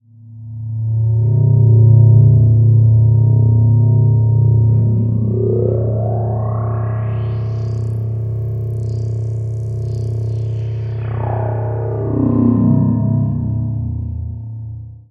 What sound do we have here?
Retro Sci-Fi, horror 03
Playing around with amplitude modulation on a Doepfer A-100 modular synthesizer.
I used two A-110 oscillators.
Spring reverb from the Doepfer A-199 module.
Recorded with a Zoom H-5 in March 2016.
Edited in ocenaudio.
It's always nice to hear what projects you use these sounds for.
Please also check out my pond5-profile for more: